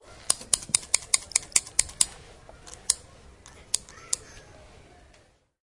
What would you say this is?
mySound WBB Margot
Sounds from objects that are beloved to the participant pupils at the Wijze Boom school, Ghent
The source of the sounds has to be guessed, enjoy.
belgium, cityrings, wijze-boom